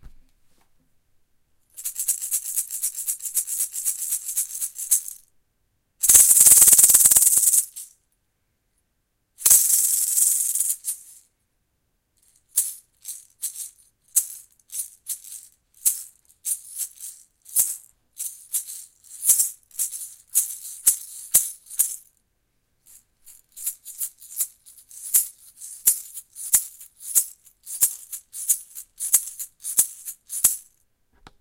Maracas - Multiple Variants
A few variants of maracas being shaken.
If this sounds is helpful, lmk in the comments :)